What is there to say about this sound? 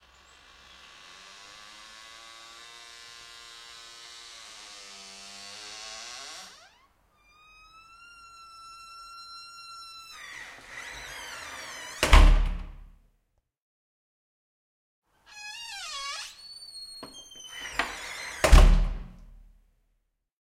FX Closing Sqeezing Wooden Door
A nice sounding door in an house in Brussels
close, closing, door, doors, open, shut, slam, squeeze, squeezing, wooden